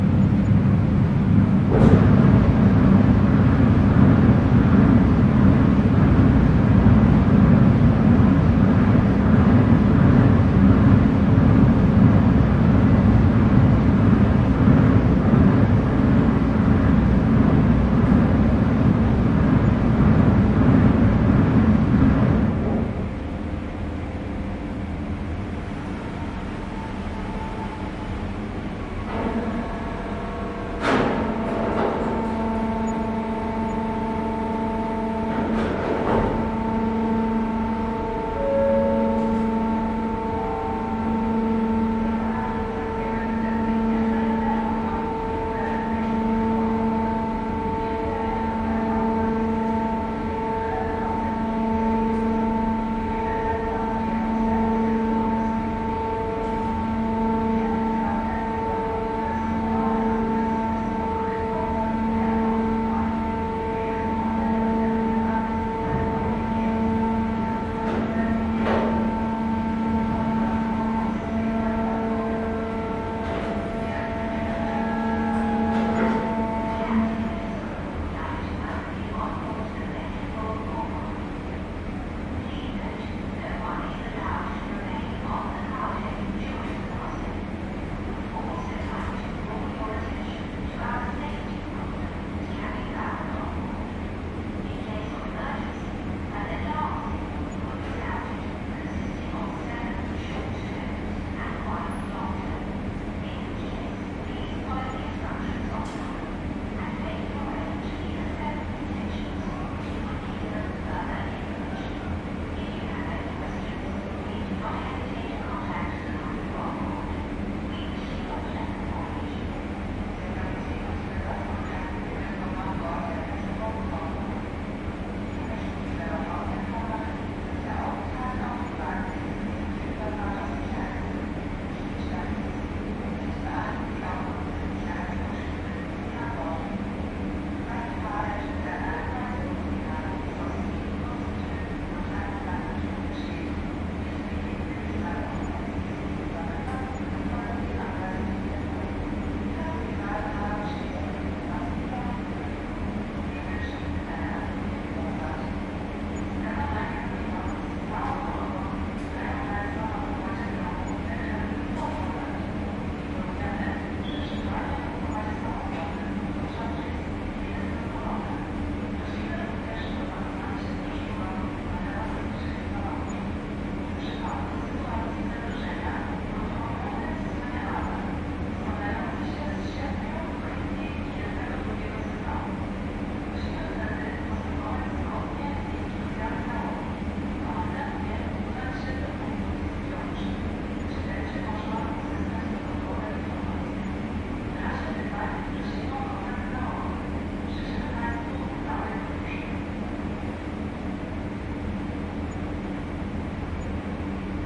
On deck aboard a Baltic Sea car ferry bound from Rostock/Germany to Trelleborg/Sweden. It is late evening, and the ferry is about to depart the harbor. In the beginning, the winches are noisily pulling in the mooring cables into compartments beneath the recorder. A PA system starts conveying safety messages in swedisch, english, german and russian.
Recorded with a Zoom H2N. These are the REAR channels of a 4ch surround recording. Mics set to 120° dispersion.